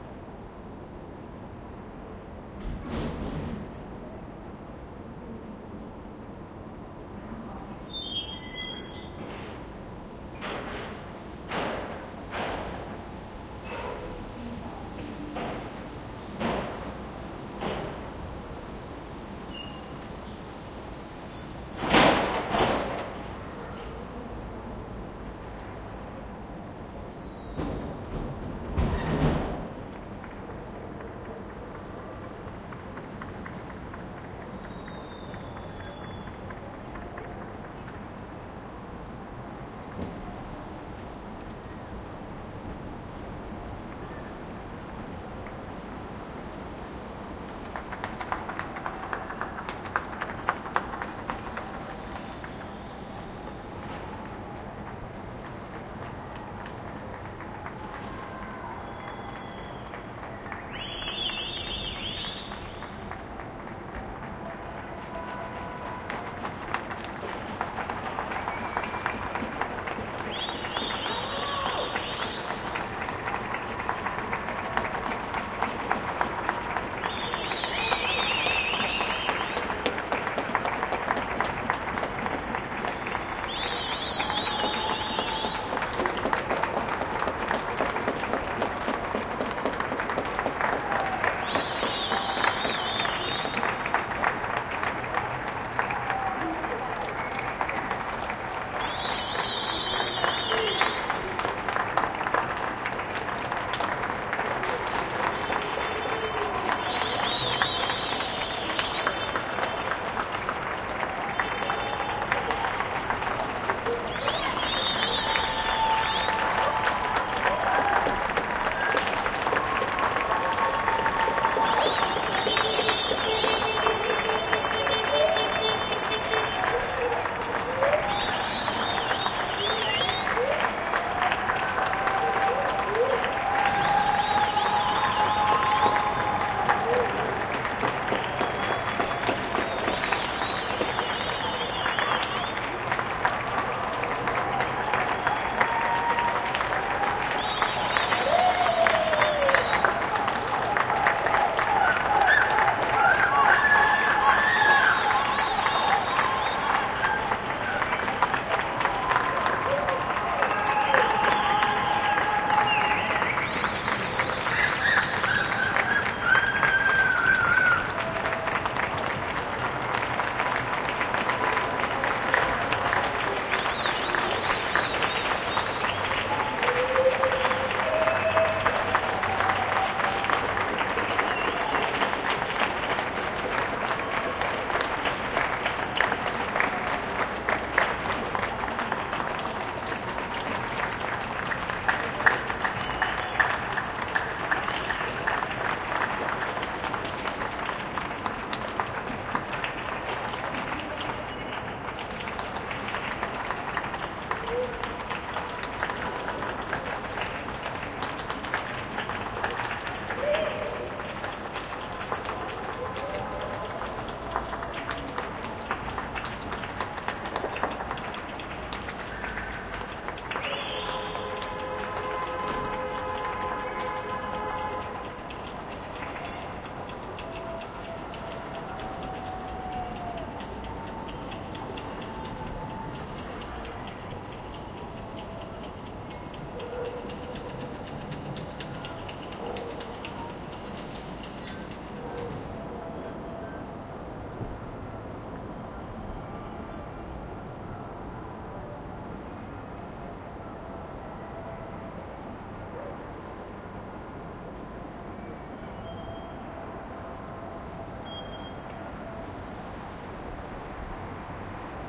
Ambi - Applausses, Cheers, thanks to the French nursing staff, Grenoble - 2020.03.23
Ambiance, Applausses, Cheers, thanks to the French nursing staff, Grenoble
Ambiance, French